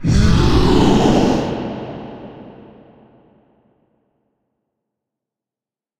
Awake The Beast.
Monster/Beast sound design/foley
Made it using my own voice and transposing it down a couple of octaves, layering it and lastly running it through a compressor, distortion plugin and a huge reverb.
Hope you enjoy it and more to be uploaded soon!
titan, scary, loud, monster, sound-design, foley, kraken, beast